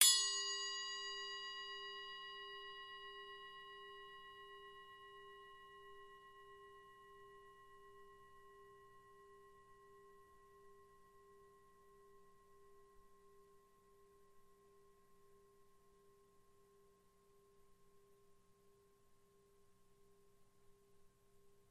zilbel 6in med4
After searching the vastness of the interweb for some 6 inch zilbel samples with no luck, I finally decided to record my own bell. Theres 3 versions of 4 single samples each, 4 chokes, 4 medium and 4 hard hits. These sound amazing in a mix and really add a lot of life to your drum tracks, they dont sound over compressed (theyre dry recordings) and they dont over power everything else, nice crisp and clear. Ding away my friends!